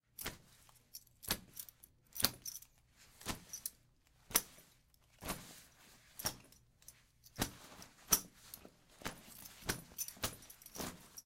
Something being hit with a butcher's knife.